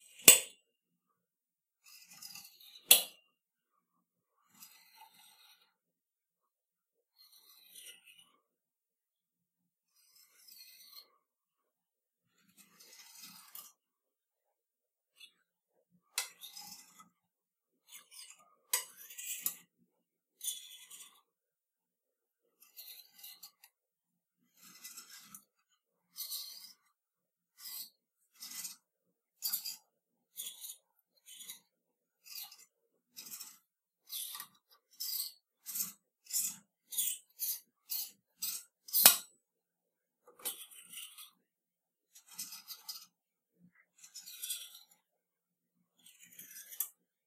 Created for a scene in which someone is browsing the selections on a rack at a clothing store -- the sound of clothes hangers sliding across a metal rod. First slow, then medium, then fast.